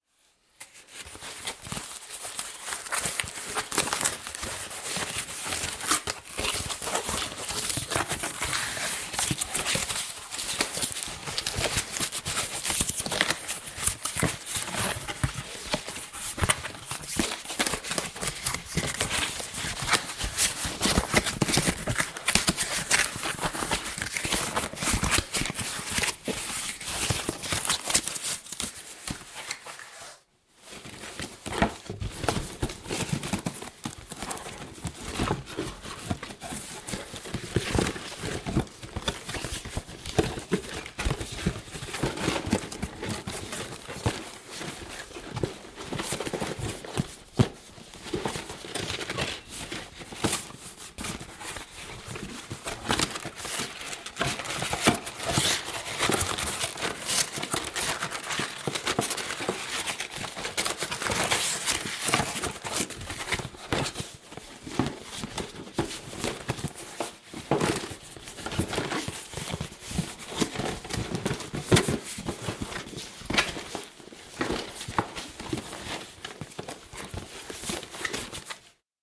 Unpack the gift box
karton, box, packet, geschenk, wrap, cardboard, gift, pappe, rustle, wrapping-paper, carton, case, papier, open, paper, unpack